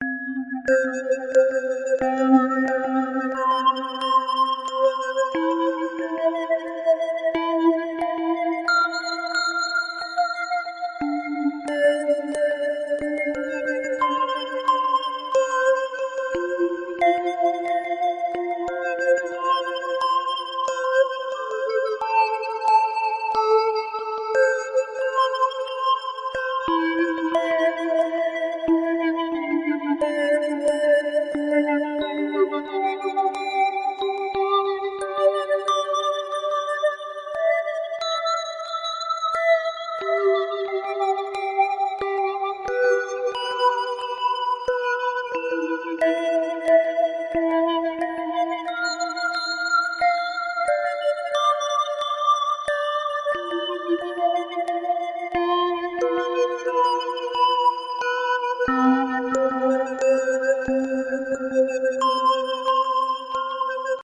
Coral Reef
diving, underwater